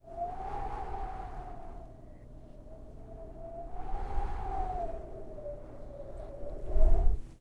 Vocal Wind Reversed
Imitation, performed, reveresed, then, vocally, wind